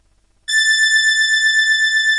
note of Brass sound about 3 seconds
Brass, note, sample, singlenote